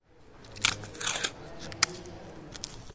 In this sound we can hear a sellotape being stretched and cut. It was recorded inside the upf poblenou library with an Edirol R-09 HR portable recorder. The microphone was located at 5cm of the source and that is why the cuts seem so closer.
sellotape, crai, sello, library, adhesive, campus-upf, UPF-CS14, tape, upf